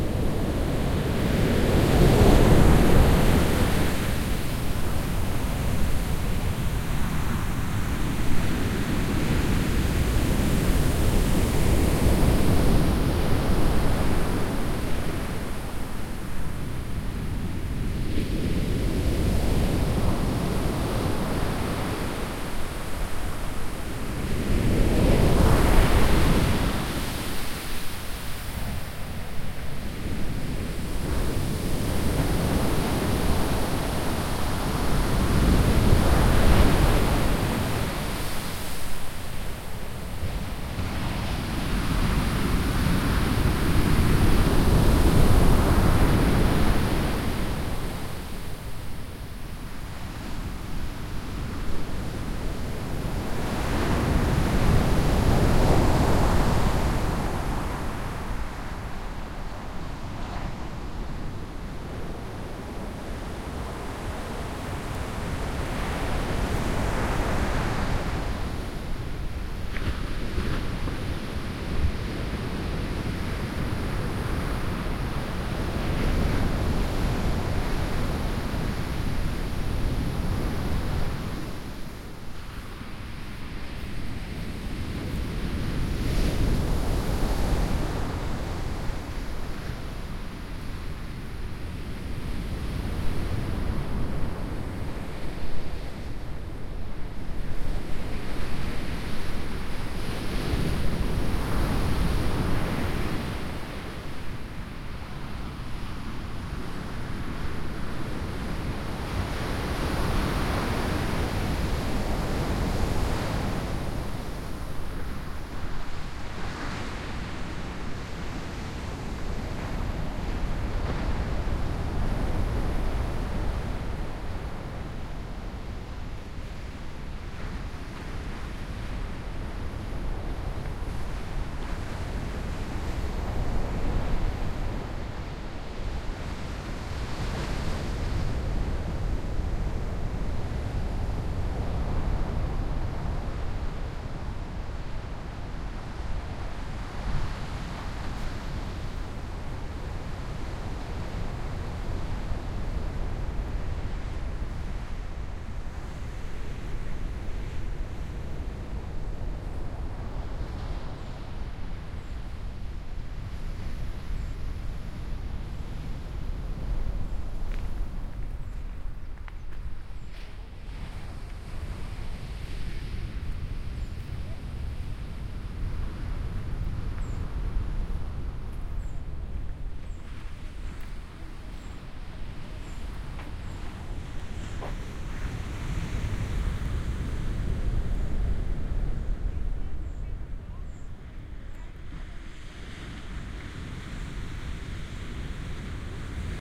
porto 22-05-14 waves during a storm, wind, walking
Breaking waves in a stormy day with wind, sand beach. Walking away with changes in soundscape
atlantic, beach, binaural, field-recording, ocean, rock, sand, sea, sea-side, spring, storm, surf, tide, water, wave, waves, wind